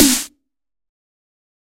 Dubstep Snare 1
A quick snae I made in fl studio with a layered high tom and white noise snare. Hope you can use it in your productions.
trance, drumnbass, bass, dubstep, punchy, fl-studio, hard, heavy, skrillex, high-pitched, music, drum, drumstep, snare